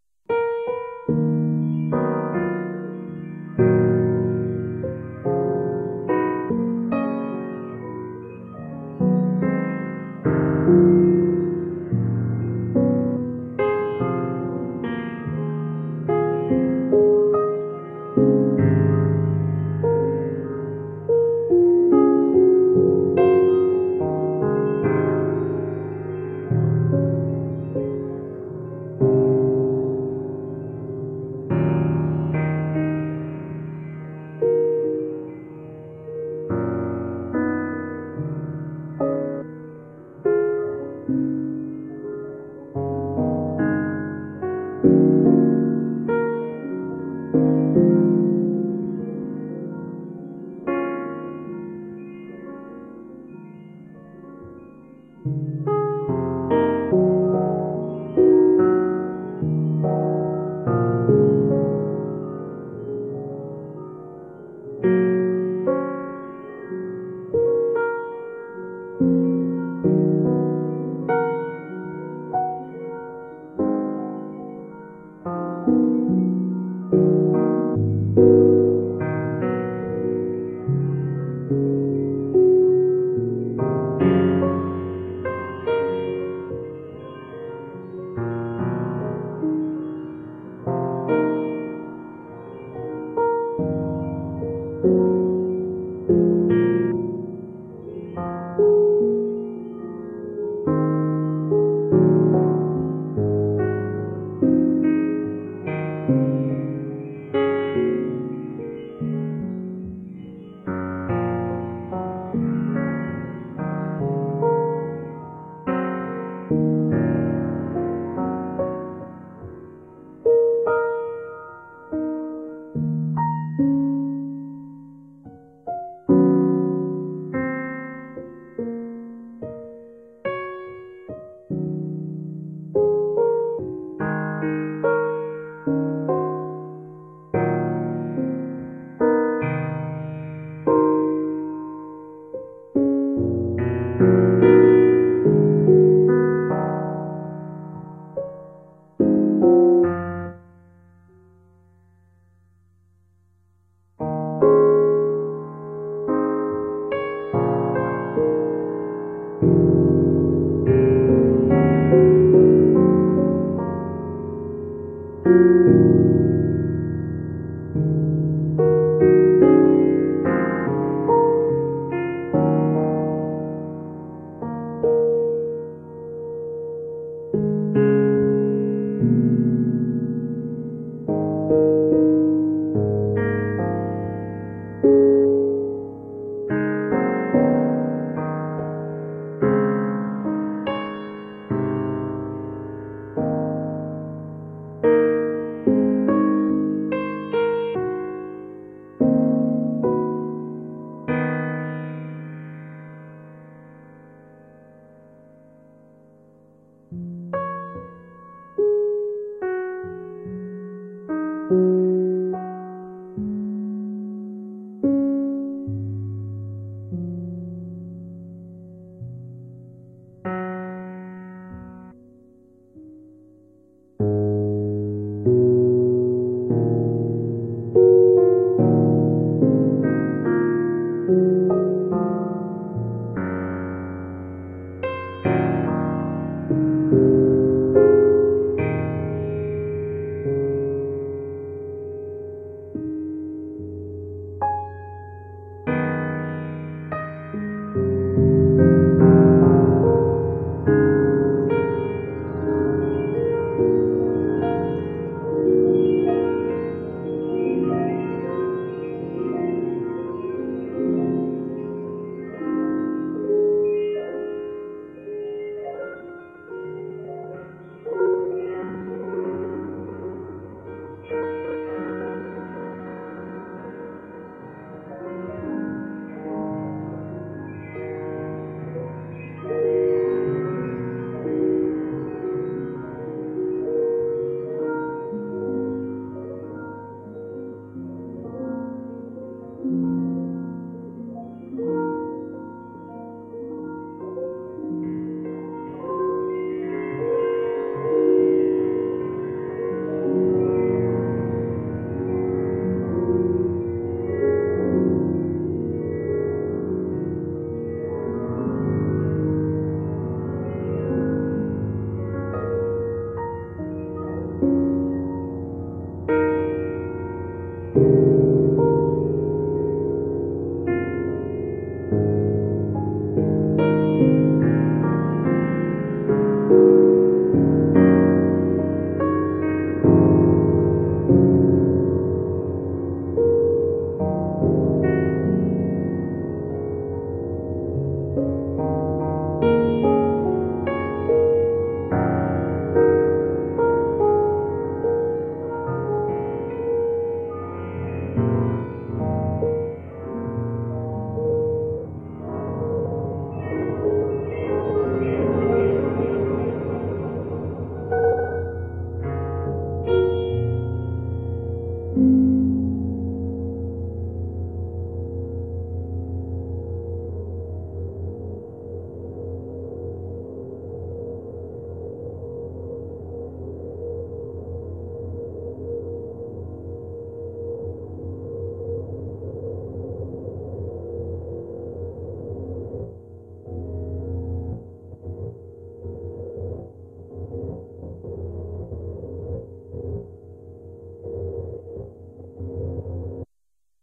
pianosound made with m4l